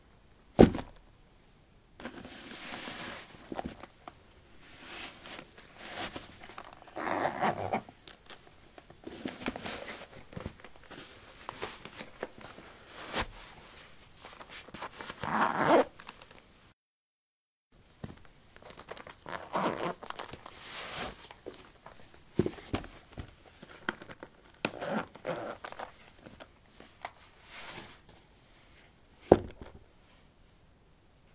soil 17d boots onoff
Taking boots on/off on soil. Recorded with a ZOOM H2 in a basement of a house: a wooden container placed on a carpet filled with soil. Normalized with Audacity.
step, footstep, steps, footsteps